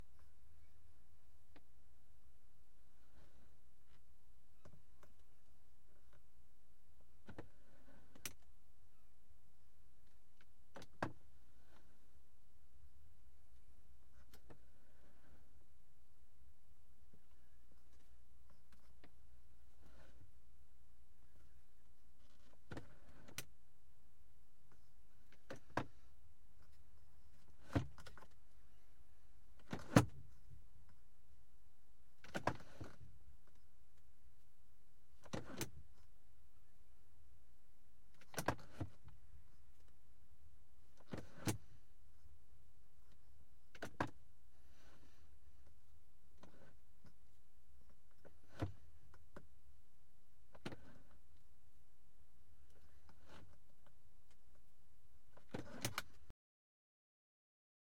HVAC Temperature knob on a Mercedes Benz 190E, shot from the passenger seat with a Rode NT1a. The knob is directly linked to a valve that opens from full-cold to heat, which can be heard clicking open and closed.
dyno, knob, switch